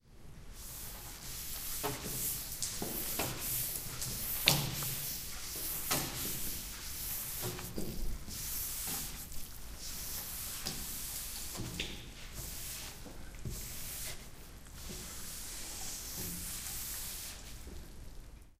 tafel putzen
Tafel wird mit einem nassen schwamm geputzt
recorded on zoom H2
we are cleaning the blackboard with a sponge
recorded on zoom H2
sfx, tafel, ger, usche, klassenzimmer, schule